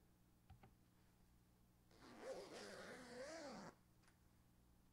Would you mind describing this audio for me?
a zipper is pulled up
zipping, Zipper, up